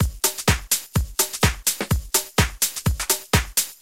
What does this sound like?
Funky House 2 126
A drum loop in the style of funky house at 126 beats per minute.
BPM, house, 126, drum, loop, 126BPM